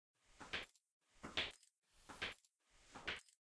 A one-shot footstep on a tiled floor.